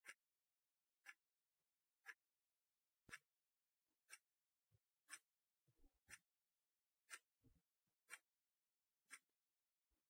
Bedroom Clock Tick
A bedroom sound effect. Part of my '101 Sound FX Collection'